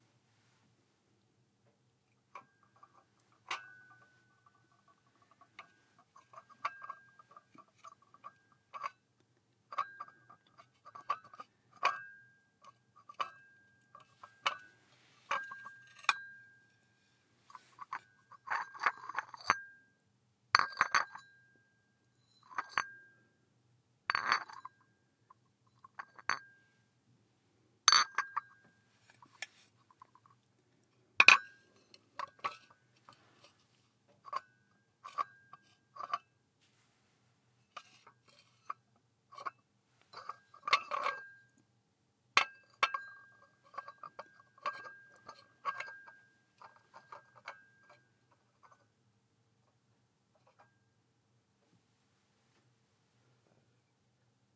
Teacup Rattle walk
Carrying teacups on a tray, which lightly jangle as they move.
It's a raw file, recorded on a SeX1 large Condenser mic.
tea, teacup, crockery, teatime, ADPP, tray, carrying